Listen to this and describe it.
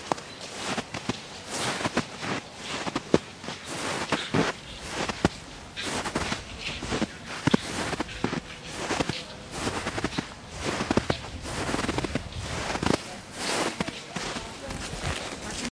chuze ve velmi vysokem snehu
walking in very high snow
winter, high-snow, walk